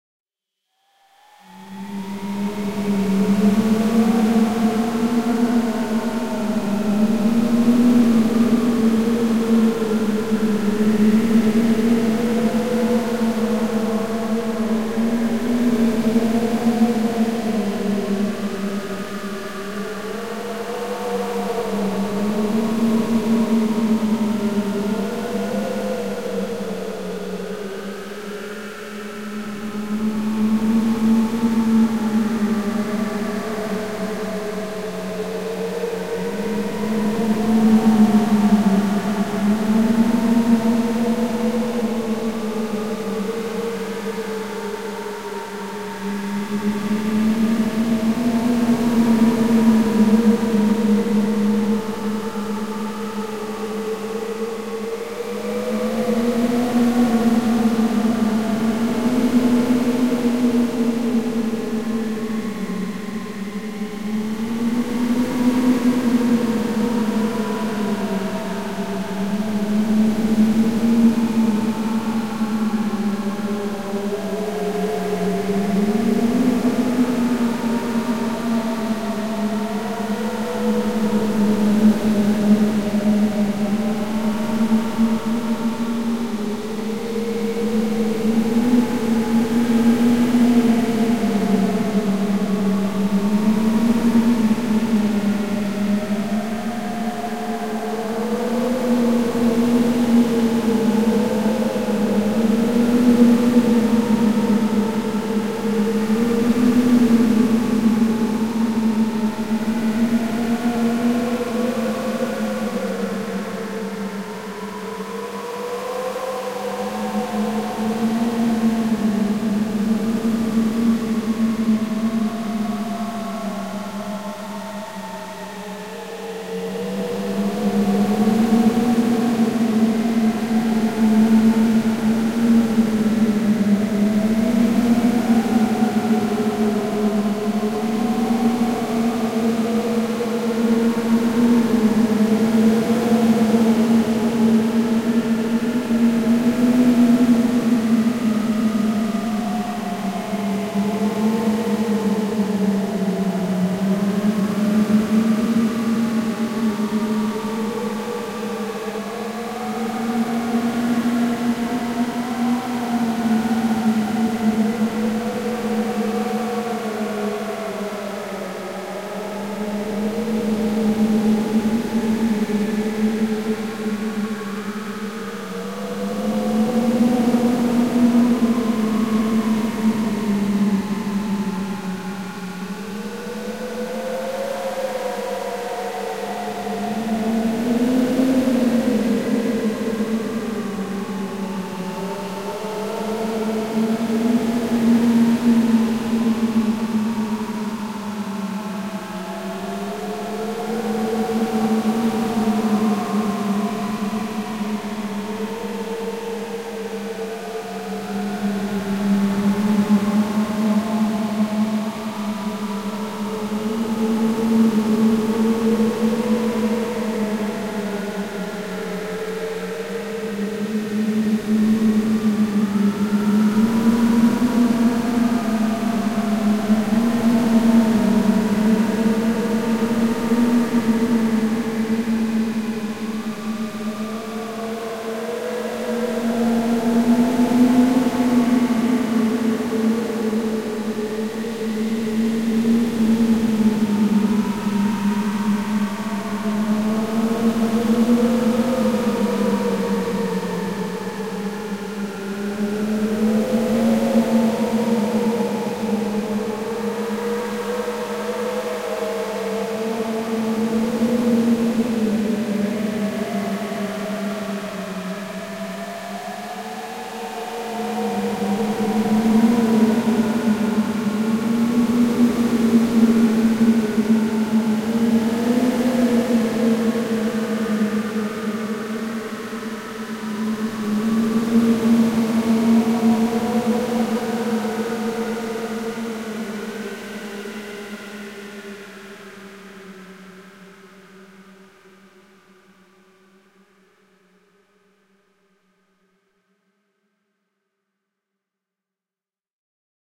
Space Drone 09
This sample is part of the "Space Drone 1" sample pack. 5 minutes of pure ambient space drone. Another drone with slow frequency changes on random tones, but less bright than the previous one.
drone, ambient, reaktor, soundscape, space